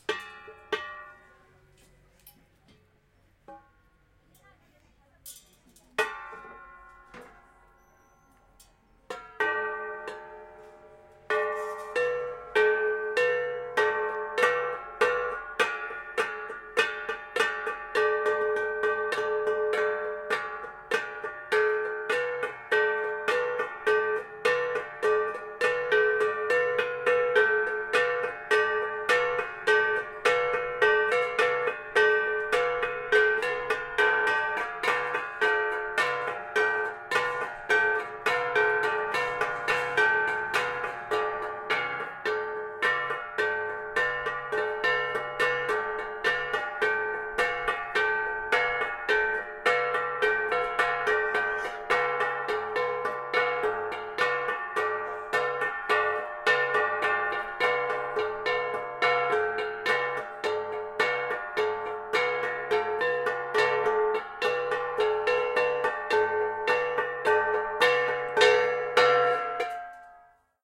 LS 32779 PH EthnicMusic
Traditional music performed by Igorot people.
I recorded this audio file in March 2015, in Tam-awan Village (Baguio, Philippines), while people from Igorot tribe were playing traditional music.(Close miking)
Thanks to all of them for their kind cooperation.
Recorder : Olympus LS-3 (internal microphone, TRESMIC off).